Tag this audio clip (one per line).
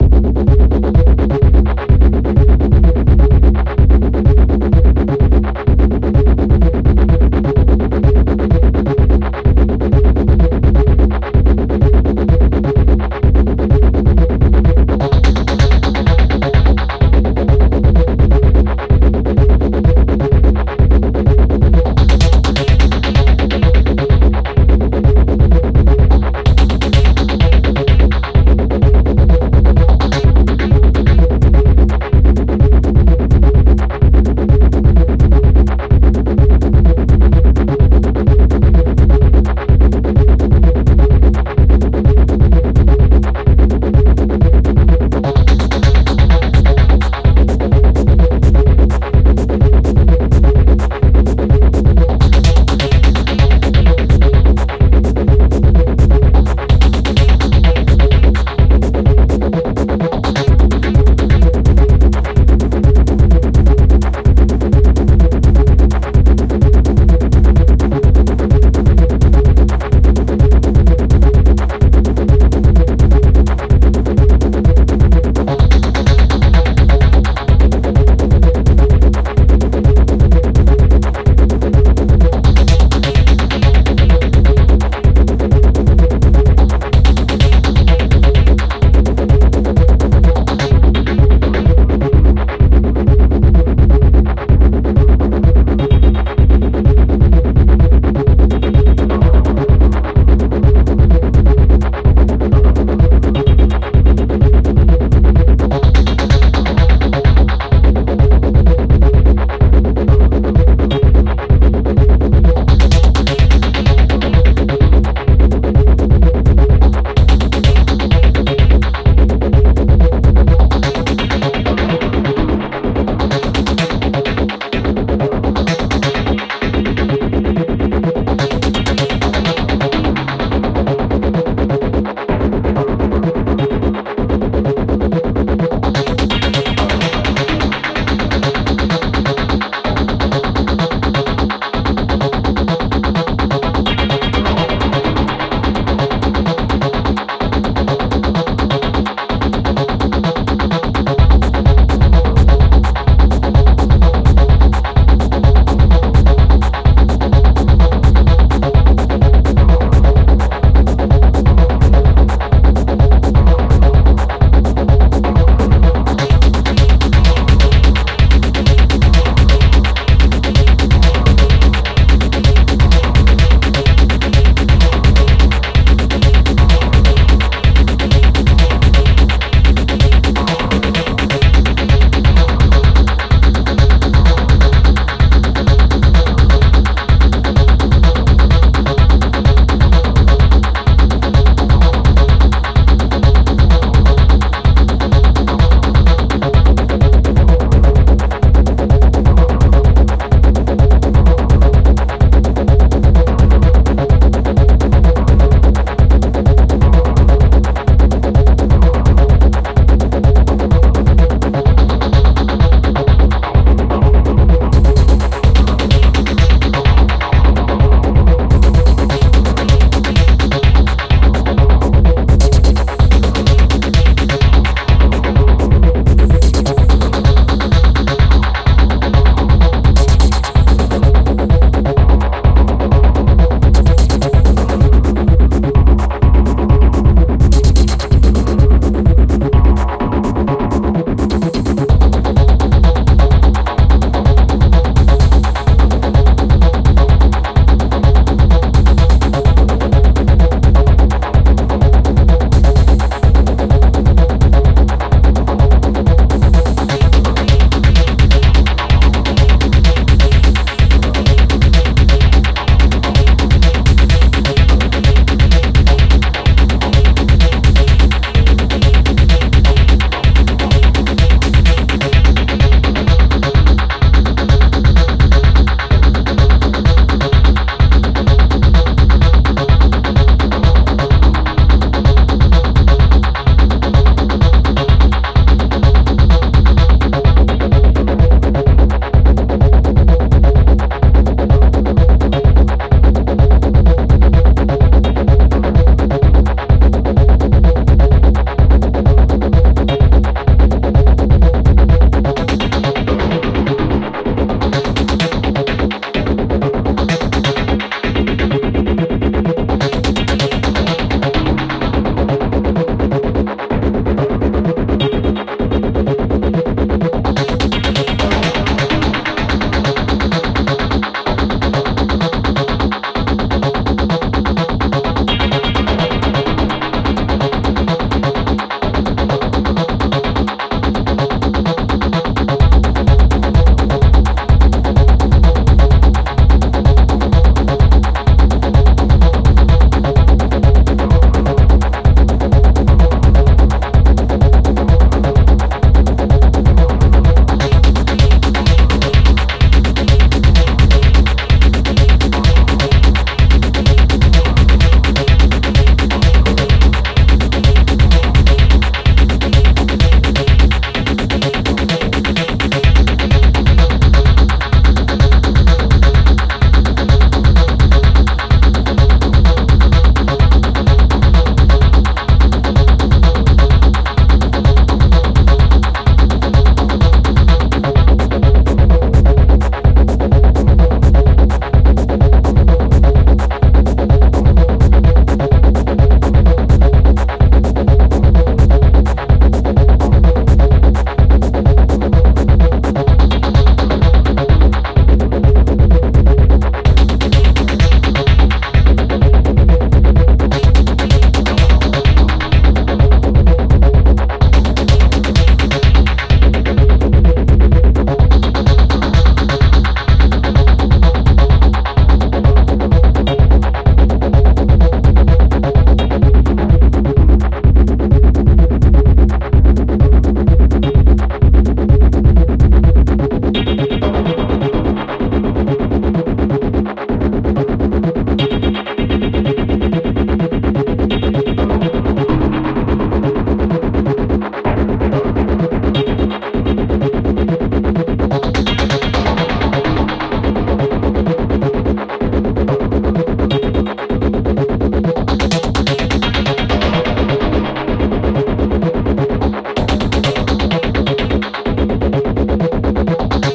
electronic
rave
dance
acid